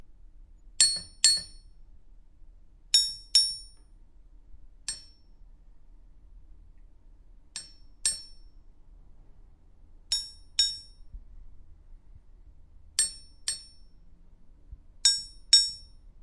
Metal sound 13 (tapping knife sharpener)
Knives being tapped by a knife sharpener. Nice percussive sound.
knife, Metal, blade, sharpen, knives, sharpener